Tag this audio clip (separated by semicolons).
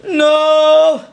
human; male; man; vocal; vocalizations